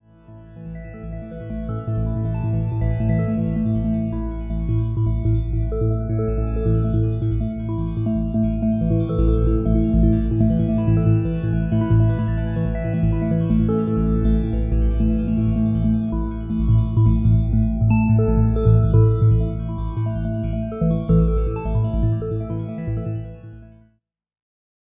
Sine Grains
A soundscape I did in MetaSynth. Base pitch A2.
background-sound, MetaSynth, atmosphere, ambient